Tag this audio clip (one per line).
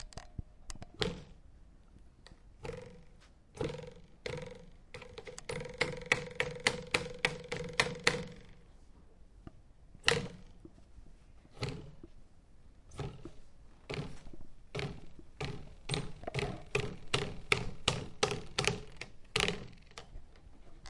Boat
engine
outboard
ruler
speedboat